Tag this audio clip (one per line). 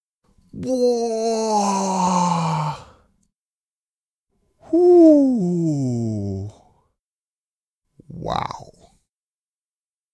amazement
astonishment
awe
human
male
man
vocal
voice
wonder
wonderment
wordless